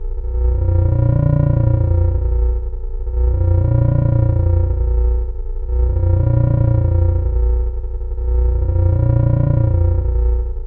Making weird sounds on a modular synthesizer.